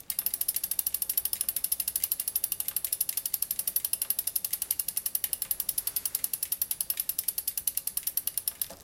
bike cvrk
Riding a bike without pedalling
bicycle, bike